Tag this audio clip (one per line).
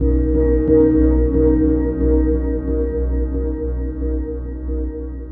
synthesizer
Ambient
Sound-Design
Cinematic
Filtered
Atmosphere
Synth